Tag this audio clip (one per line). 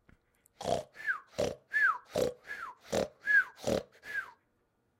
snore
fast
snoring
big
cartoon